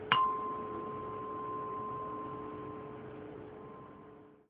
I couldn't find any real and free glockenspiel sounds,so I recorded my own on my Sonor G30 glockenspiel with my cell phone...then I manipulated the samples with Cubase.I hope you like them and do whatever you want with them!